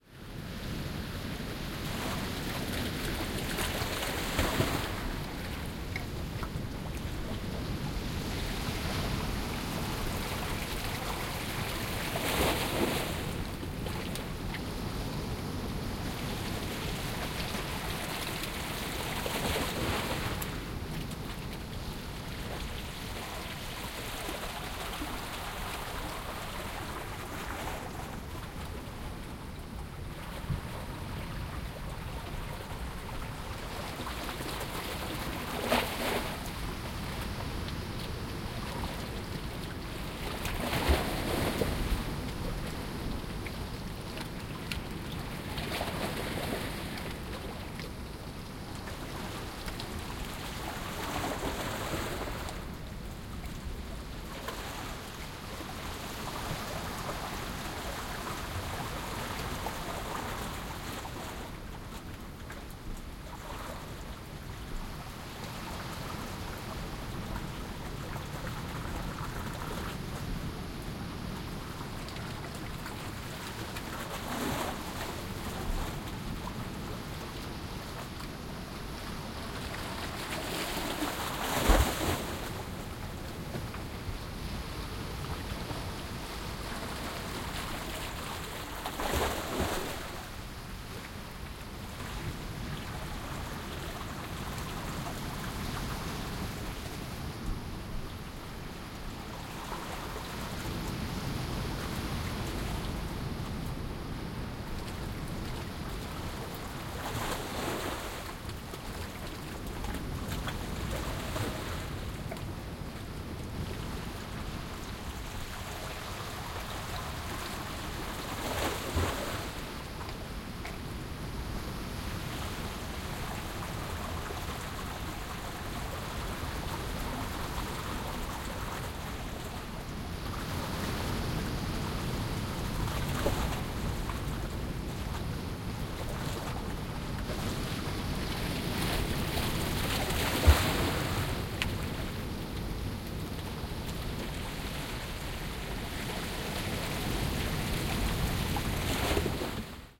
Mediterranean sea recorded on a flat rock shore (Jávea, May, midnight, calm weather). There are distant waves and the water streaming through a small canal in the rocks. We can hear the streaming water flowing very close.
Binaural recording (head-worn Soundman OKM II Studio Klassik + A3, zoom h4n recorder)